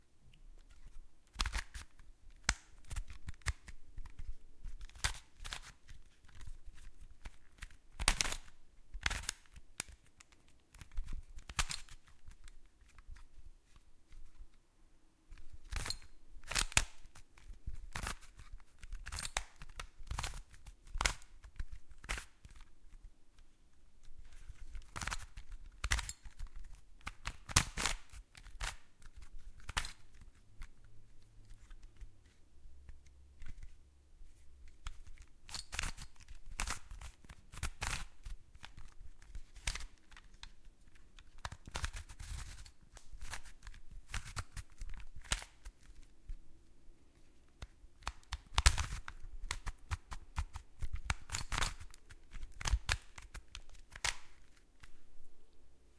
rubiks cube
Solving one side of an old Rubik's cube. Recorded with AT4021s into a Modified Marantz PMD661.
cube, friction, noise, puzzle, click, rubiks, spring, foley